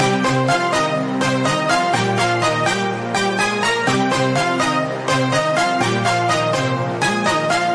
Another one Melody Loop, created with Alesis Andromeda A6. Perfect for House music. Cheers!
TREND, SYNTH, MELODY, HOUSE, Alesis, GROOVE